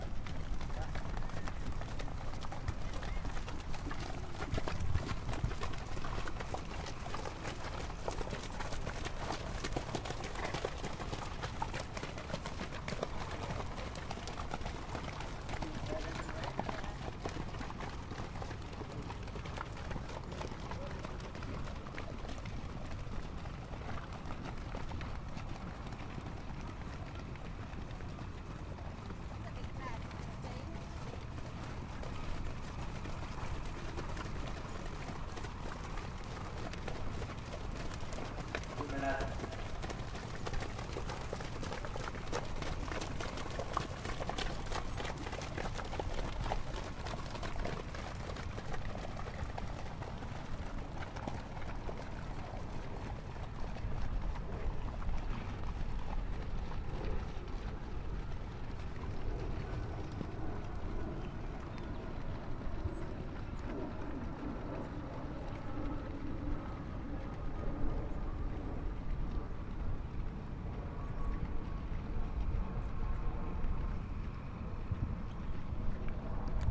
This is a recording of the horses at Arapahoe Park in Colorado as they head to the start of a race. It was pretty quiet here so there aren't really any crowd sounds.